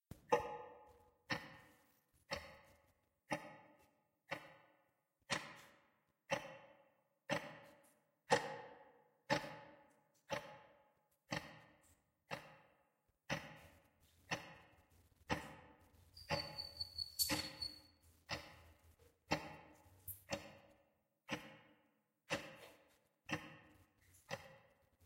sound of a small clock on the night table./ sonido de pequeño reloj sobre el buró.